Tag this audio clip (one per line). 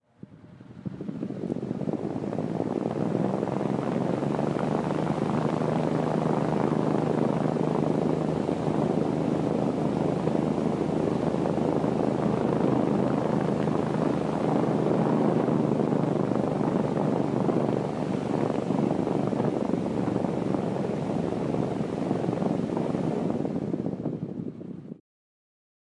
missle
rocket